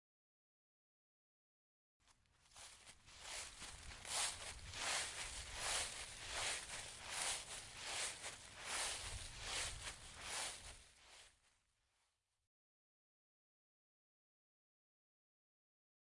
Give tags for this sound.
CZ Panska Czech

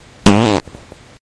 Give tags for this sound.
fart flatulation flatulence gas poot

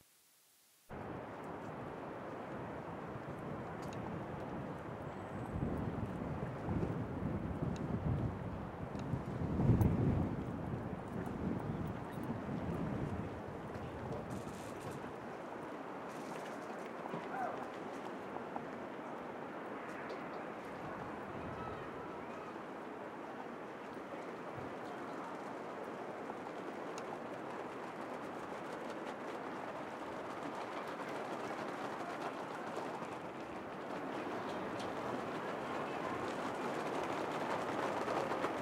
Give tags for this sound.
dock night

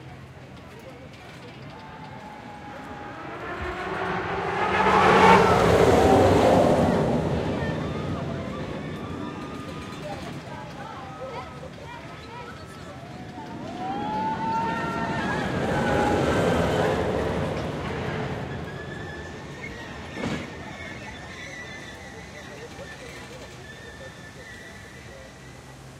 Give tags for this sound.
environment park roller amusement thrill themepark atmosphere Achterbahn coaster

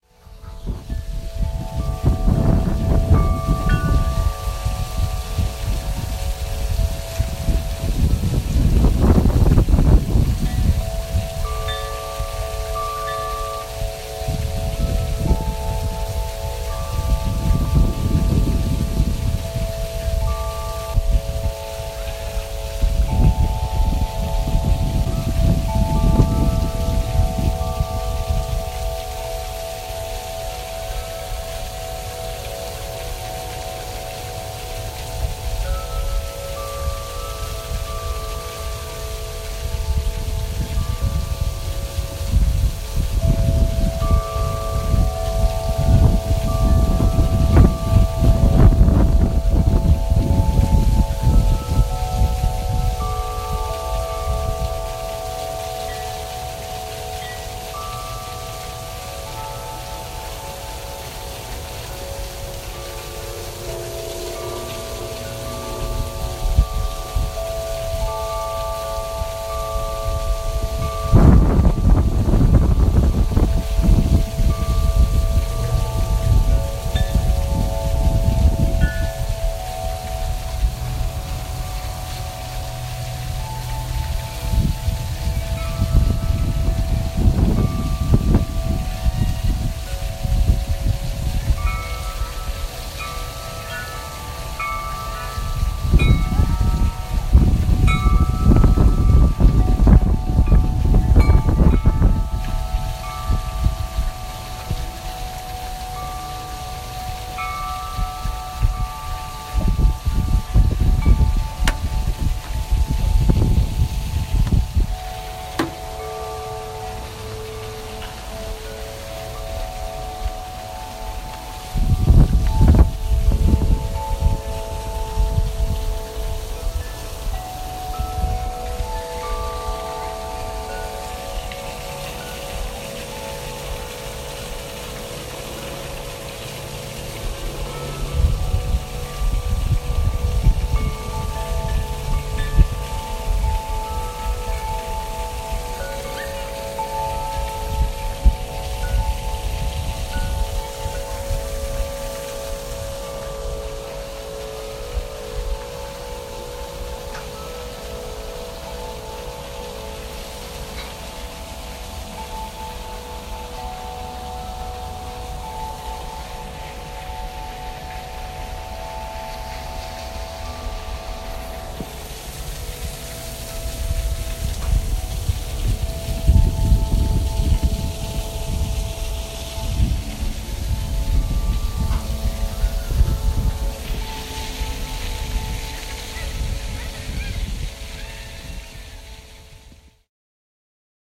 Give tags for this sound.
water chimes wind nature windchimes outside

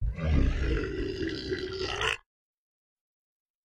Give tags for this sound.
dark bass scream gigantic enormous

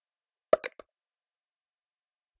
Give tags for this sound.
boop bubble pop

